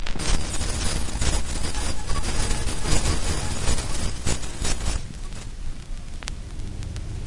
light hiss in the background (field-recording of the groove between
songs on an old record) with a busy wavering pitched foreground
glitch, rhythmic